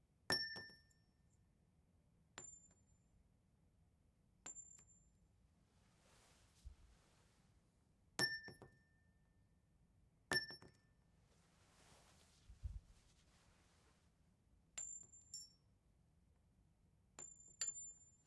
Screws Drop on Floor
Multiple screws of varying sizes being dropped on the floor